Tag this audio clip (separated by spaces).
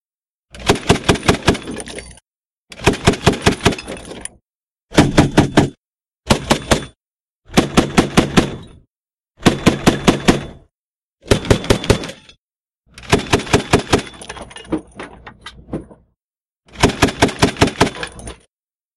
arms army attack bomb charge defense explosive game grenade gun military missile projectile shooting suppression tactical technology turret weapon